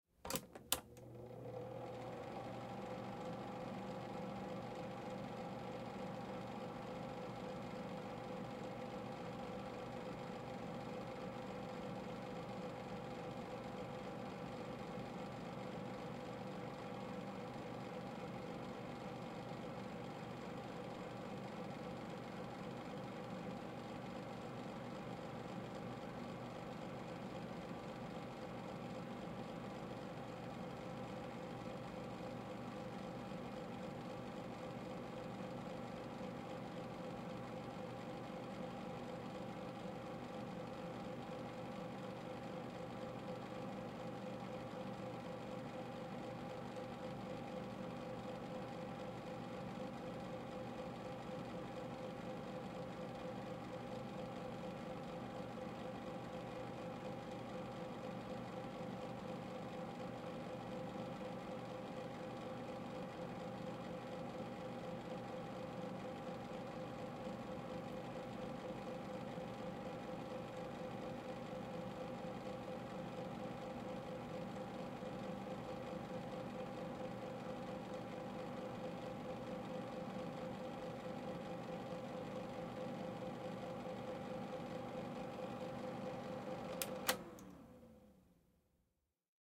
Overhead Projector On Run Off POV
Overhead projector at operator distance.
projector, fan, overhead